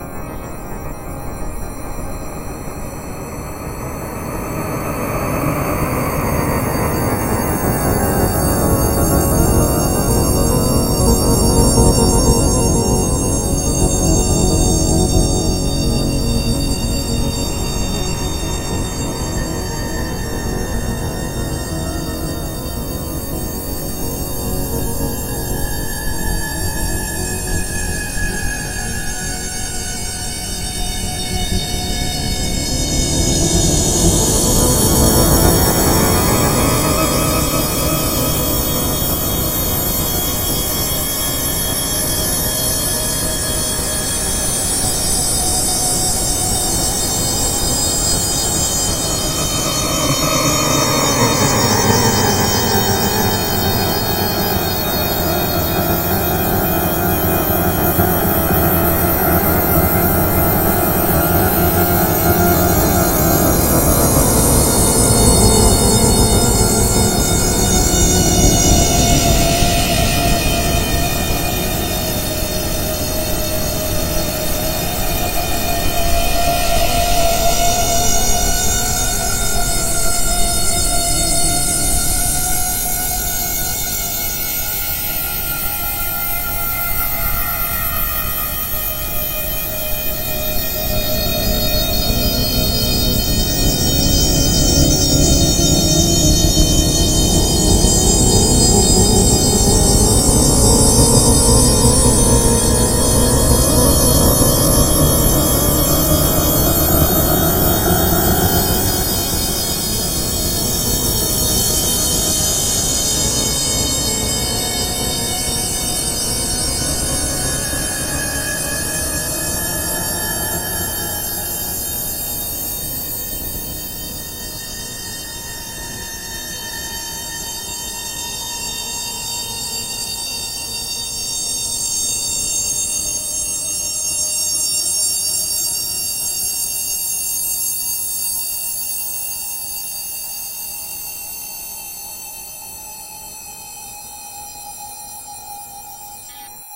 santa on acid
psychedelic, sonicfire, soundeffects, weirdness